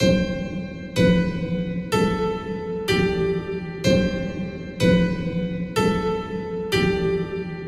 TS SYNTH 125bpm 89
Simple music loop for Hip Hop, House, Electronic music.
hip-hop, music-loop, sound, trap, electronic, house-music, sample, trap-music, loop, synth-loop